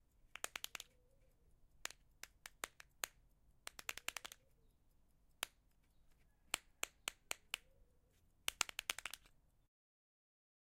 Tapping on hard plastic: Various tapping on a hard plastic circle, fingernail on plastic. slight impact subtle. Recorded with Zoom H4n recorder on an afternoon in Centurion South Africa, and was recorded as part of a Sound Design project for College. A disk of made from a hard plastic was used.
button, hard-plastic, owi, plastic, tap, tapping